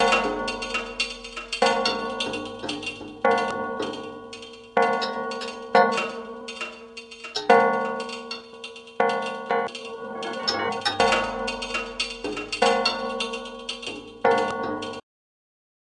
Metal + Decay (Metal Reel)
This is an excerpt from Slow Decay, a project commissioned by Istimrar 2021 - An Irtijal Festival Initiative
Drumming by Nadia Daou playing various percussions on a steel metal plate.
drums, metal, morphagene, noise, percussion-loop